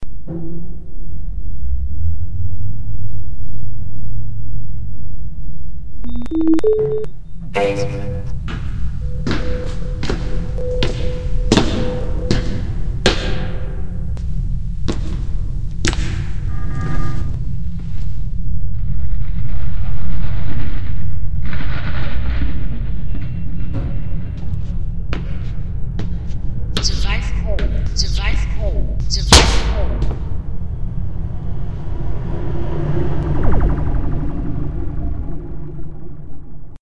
MAZZOTTA Pauline 2020 2021 Hangar
I used the same sound of elevator but changed the height and level, I really wanted to put forward the mechanical noise it makes. The alarm is a tone generated on Audacity and repeated with a fade in and fade out. I put a brownian noise for the background, the mechanical sound we hear is me, closing my shutters. I change the speed and the bass and add some reverb.
The voice we hear after the mechanical noise is a record of my bluetooth headphones, it is used as an error message.
We also hear the sound of a spaceship approching,I create this on a stereo channel so we can get the impression that the spaceship is going by the character. It also shoot laser beams which are made out of a generate sound with change of speed and vocoder.